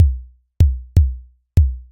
track12drumkick
part of kicks set